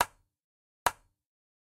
Switch On
Created with: Music Forge Project Library
Software: Exported from FL Studio 11 (Fruity Edition)
Recording device: This is not a field recording. (Some VST might have)
Samples taken from: FL Studio 11 Fruity Edition
Library:
(Scores are now included in the patcher)
Patcher>Event>Switch>Light Switch
Set To "On"